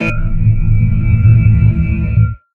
atmosphere noise 001
White noise processed with FIR-filter.
atmosphere, engine, film, horror, industrial, noise, sci-fi